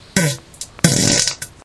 crackly toilet fart 2
fart poot gas flatulence flatulation
fart, flatulation, flatulence, gas, poot